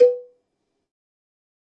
MEDIUM COWBELL OF GOD 016
cowbell, drum, god, kit, more, pack, real